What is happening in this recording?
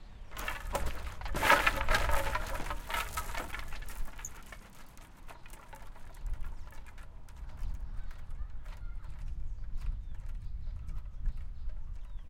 Wooden bridge
This was recorded with an H6 Zoom recorder at Zita park as I walk across a bridge connecting two jungle gyms with the shaking of planks and rattling of the chains.